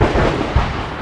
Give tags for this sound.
edited; loops; percussive; thunder

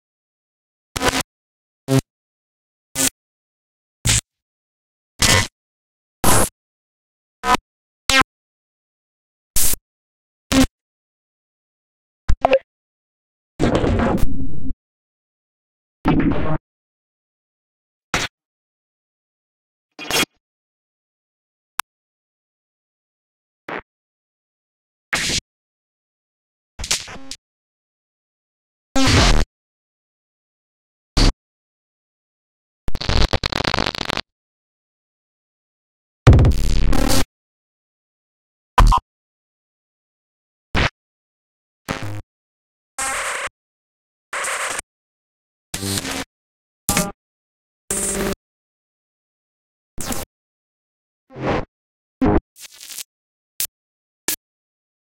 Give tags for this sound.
Distorted; Drum; FX; One-shot; Percussion; Techno

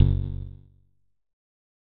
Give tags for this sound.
percussive-hit; button; percussion; drum; percussive; spring; error; filtered; bounce

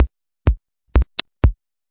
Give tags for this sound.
tech; minimal

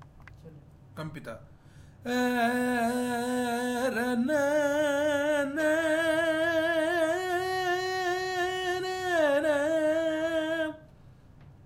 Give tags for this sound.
kalyani-raaga; carnatic; gamaka; india; compmusic; music

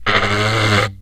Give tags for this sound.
daxophone; friction; idiophone; instrument; wood

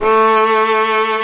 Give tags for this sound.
keman; arco; violin

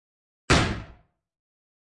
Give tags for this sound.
army; firing; gun; gunshot; military; pistol; rifle; shooting; shot; war; weapon

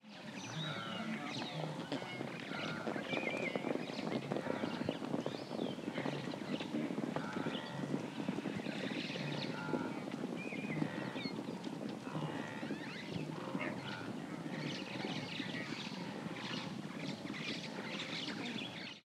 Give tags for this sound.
wilbeest; gnu; africa; tanzania

